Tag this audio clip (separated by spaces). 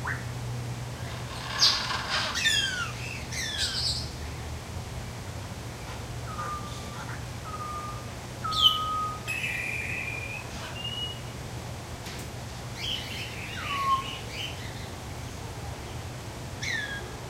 aviary bird birds exotic field-recording parrot tropical zoo